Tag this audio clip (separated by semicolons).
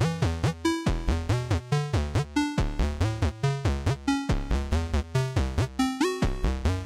music; samples; sounds; digital; loops; 8-bit; hit